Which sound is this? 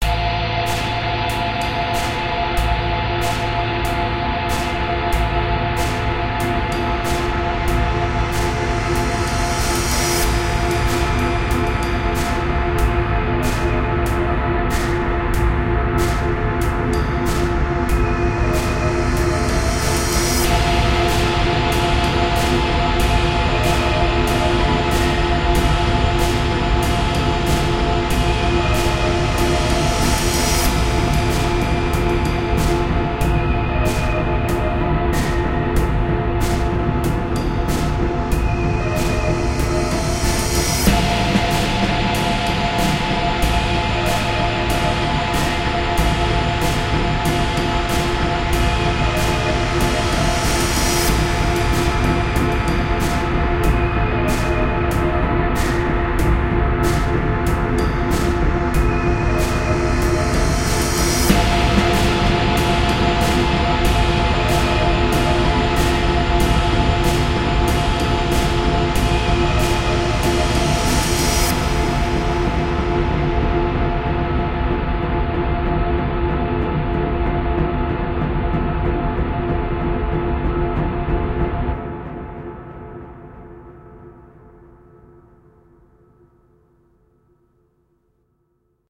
Another very old production, I think this one's from around 2009 even!
Using the reverb of a chord I made on my guitar I created a drone-y, washed out sound. Added some subtle bitcrushed drums, cymbals and a VST synthesizer plays some notes to fill it out a bit, and a dark brooding bass-like instrument pulls up near the end of the track.
ambient, drone, drums, freaky, guitar, horror, mysterious, sinister, synth